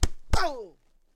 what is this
Two punches with reaction sounds recorded in studio